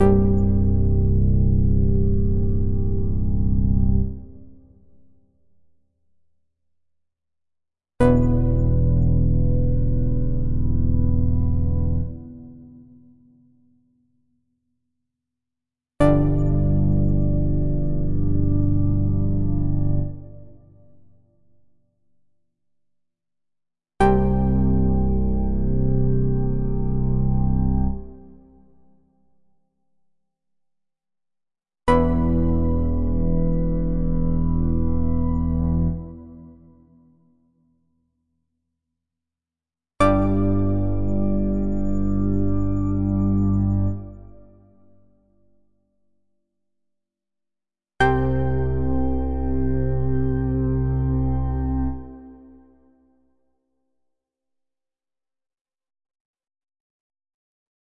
FM House Synth recorded as escalating notes C Major for importing into a sampler
fm, house, synth